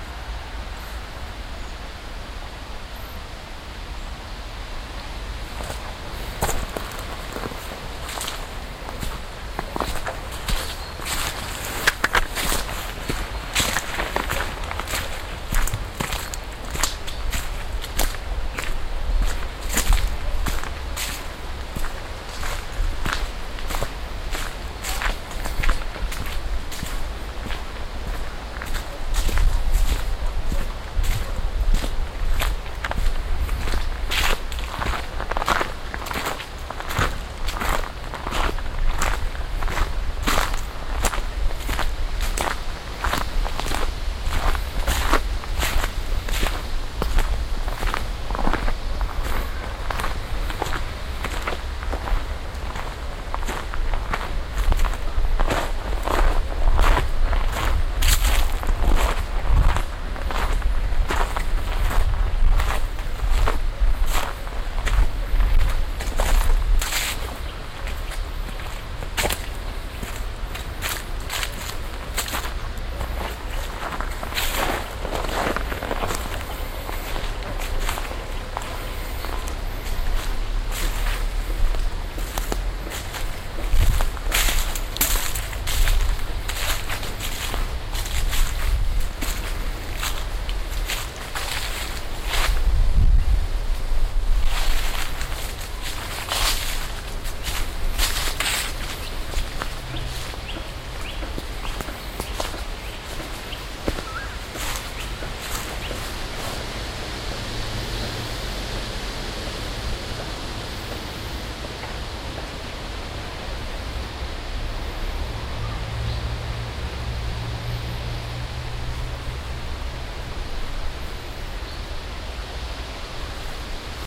saturday walk in the park
Just me taking a little walk in the park in Varde, in Denmark. The path brings me in different places, so you can hear footsteps in grabble, in leafs and in forrest soil.
Recorded with a Sony minidisc MZ-R30 with binaural in-ear microphones. Edited in Audacity 1.3.5-beta on ubuntu 8.04.2 linux.
ambience, walking, grabble, park, fieldrecording, binaural